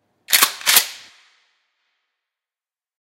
Rem870 Pump3
A Remington 870's pump being cycled.
FX, Gun, Shotgun, Shotgun-Pump